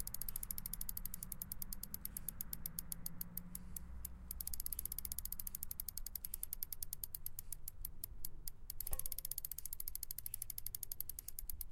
spinning bike wheel
A spinning bicycle wheel recorded with a Zoom H2.
bicycle, bike, chain, freewheel